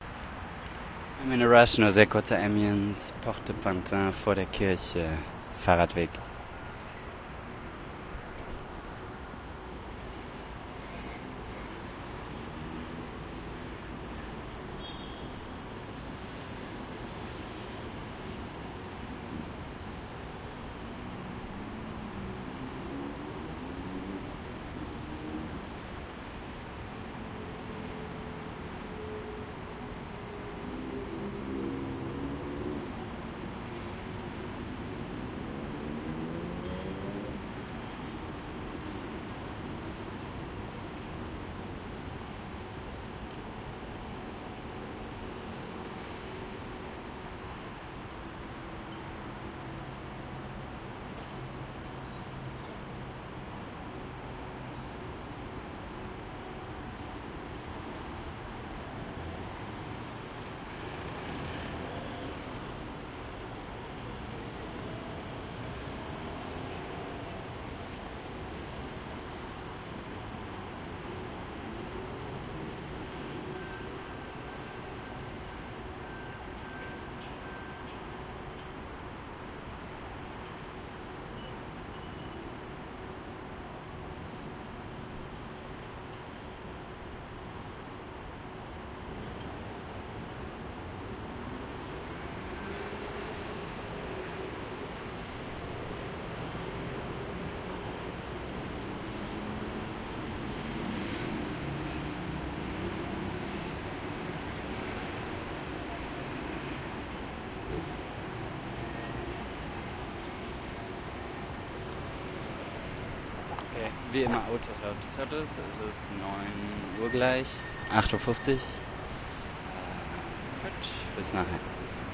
AMB PARIS Porte de Pantin vor Kirche
Ambience, Pantin, Paris, Street